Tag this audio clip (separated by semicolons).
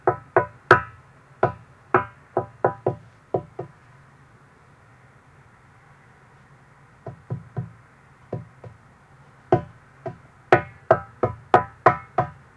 underwater; field-recording; hydrophone